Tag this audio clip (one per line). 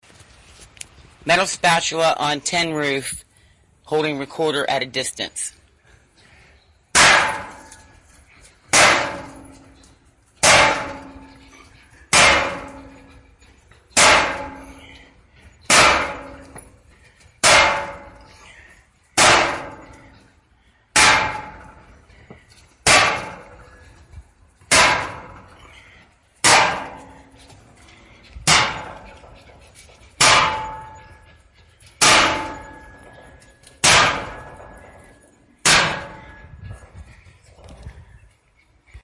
impact
reverberate